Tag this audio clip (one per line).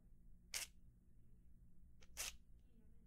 pull
rope
sound